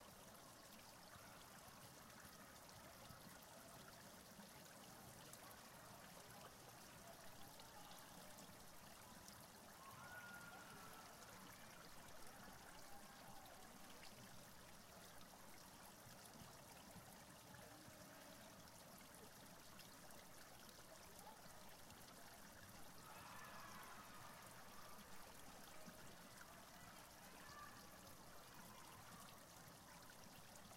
Uni Folie FountainWater
Fountain, splashing